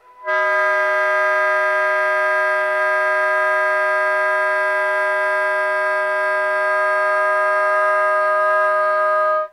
I found the fingering on the book:
Preliminary
exercises & etudes in contemporary techniques for saxophone :
introductory material for study of multiphonics, quarter tones, &
timbre variation / by Ronald L. Caravan. - : Dorn productions, c1980.
Setup:
multiphonics, sax, saxophone, soprano-sax